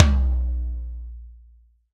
SRBM TOM 003
Drum kit tom-toms sampled and processed. Source was captured with Electrovice RE-20 through Millennia Media HV-3D preamp and Drawmer compression.